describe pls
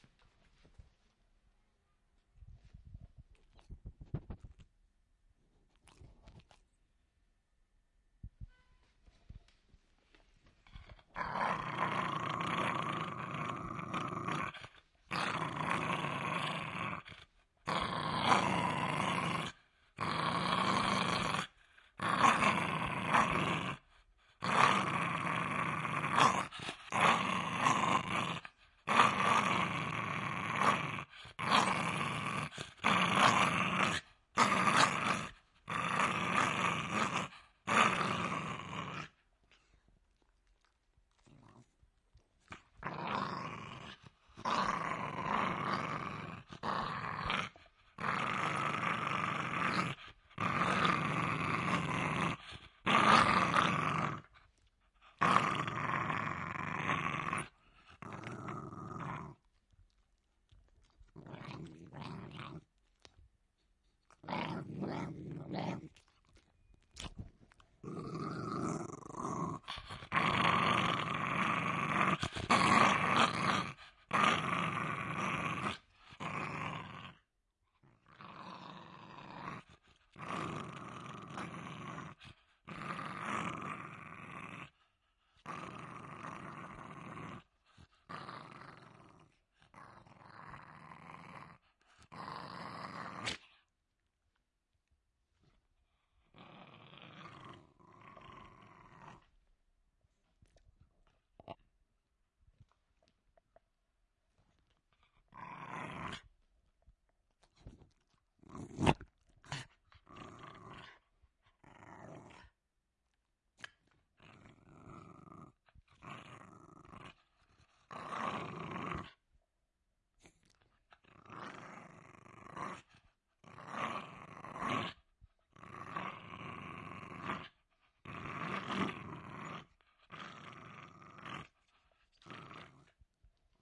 Dog Growl
This is a recording of a Jack Russell growling from a close perspective. This is a mono recording which I've done with a Beyer M88 into a sonosax mixer recorded into a zoom h4n.
russell, dog, Small, jack, close, growl